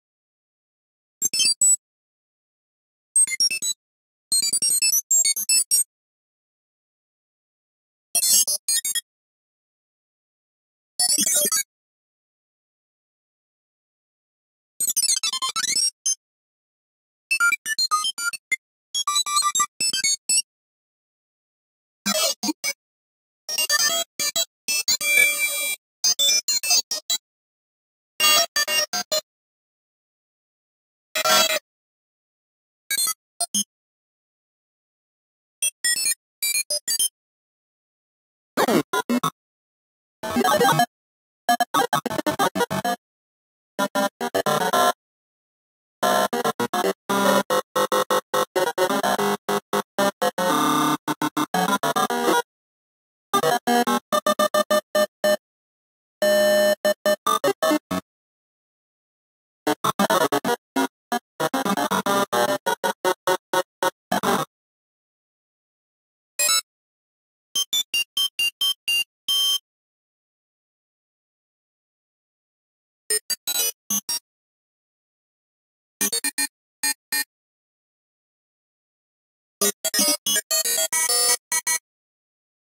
hud/pc sound
Well I made it for my personla project to use it in the holographic scene... Hope it helps somebody.
computer, game, science-fiction